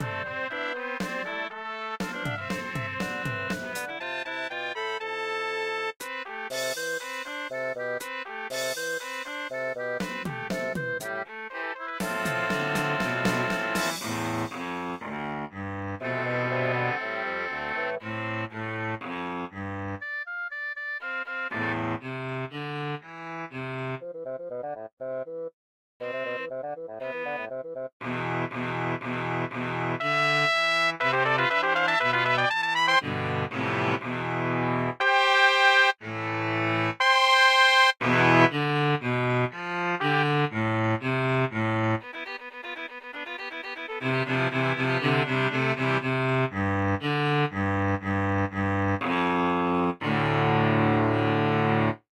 Codey of Dusk
Song that includes many instruments, including Trumpet and drums
score, trumpet, experiment